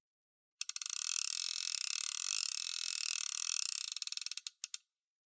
Angel Fly Fish Reel Slow Wind 2
Hardy Angel fly fishing reel winding in slowly
pulling, turning, clicking, reel, fly, winding, retrieve, fishing